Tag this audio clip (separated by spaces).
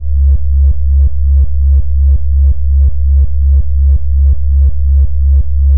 robot pulse heartbeat electronic synthetic